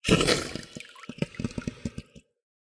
various sounds made using a short hose and a plastic box full of h2o.